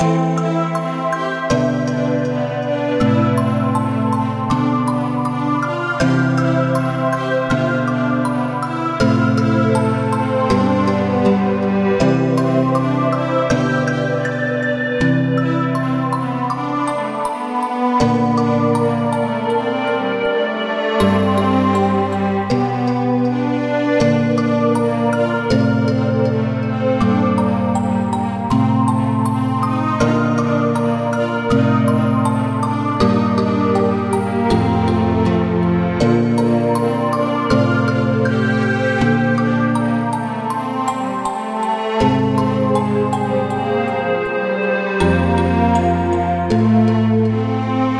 short loops 01 02 2015 1b
made in ableton live 9 lite with use of a Novation Launchkey 49 keyboard
- vst plugins : Alchemy
game loop short music tune intro techno house computer gamemusic gameloop
computer, game, gameloop, gamemusic, house, intro, loop, music, short, techno, tune